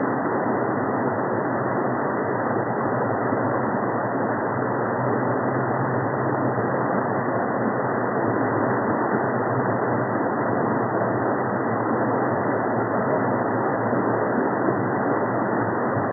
Underwater Beneath Waterfall or Rain (Loopable)
This is a sound of rushing water or a waterfall heard while underwater. It could also be interpreted as the sound of heavy rain. Altered from a recording of a flash flood.